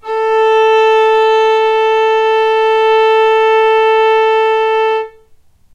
violin arco non vib A3
violin arco non vibrato
arco, non, vibrato, violin